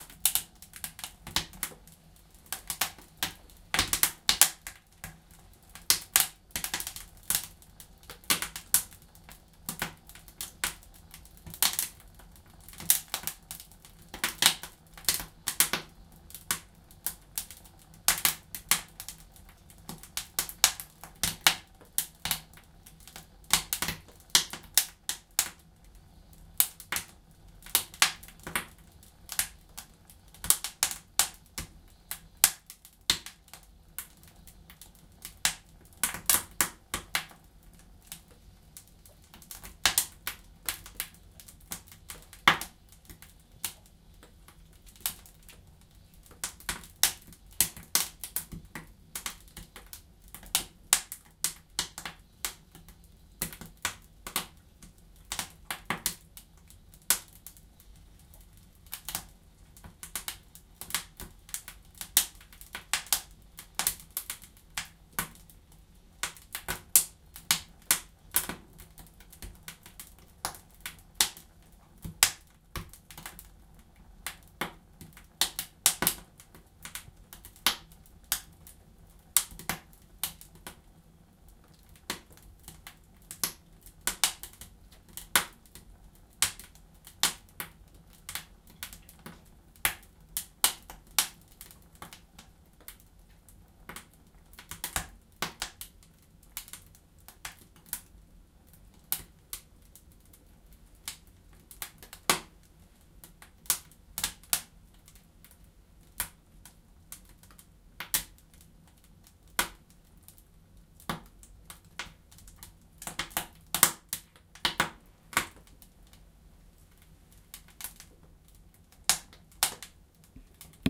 I made fire in the stove of a herders cabin in the alps preparing supper. Outside the wind howling, two meters of snow. This is a raw recording with Zoom H2n.